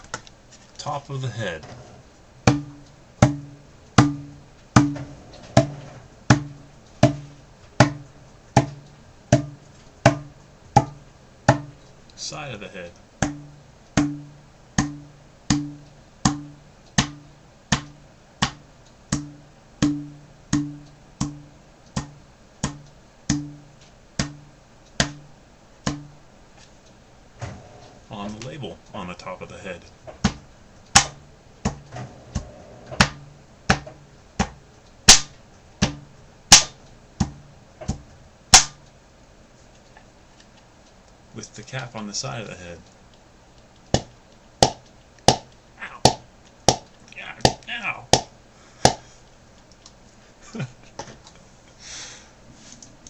2-liter Sprite bottle hitting the top and side of my head
Plastic 2-liter Sprite Bottle bonking my head in various places. Bottle cap is screwed on tightly and label is intact. Recorded indoors with my Sound Blaster Recon3Di internal microphone array via Audacity 2.0.6.
Audible narrations included between and as part of the following list of subclips.
0:00-0:12 - Bottom (harder end) of the bottle on the middle top of my head
0:12-0:27 - Bottom of the bottle against the side of my head above and behind my right ear
0:27-0:41 - Middle of the bottle (label) on the middle top of my head.
0:41-0:53 - Cap end against the middle top of my head.
2-liter, bonk, bottle, container, effect, hit, hollow, plastic, sprite, thud, thunk